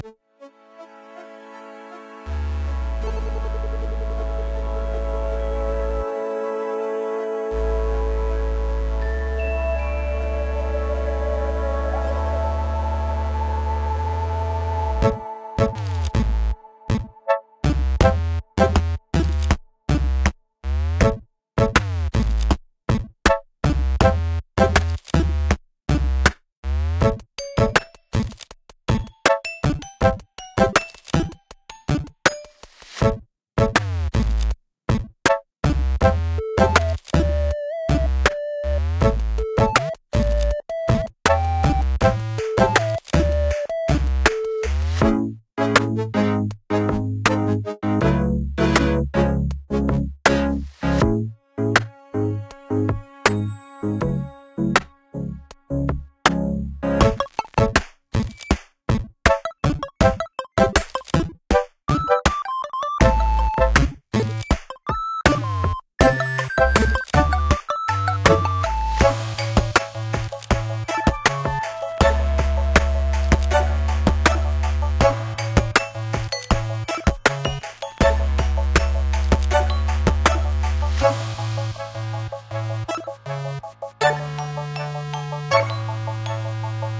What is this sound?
Bit Forest Intro music
Intro theme music of for a concept track called bit forest